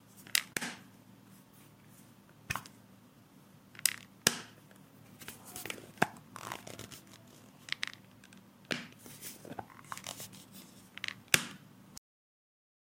Glasses casing being opened and closed